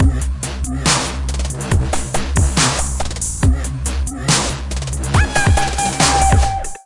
W.I.O.dubstep loop002
drums, electro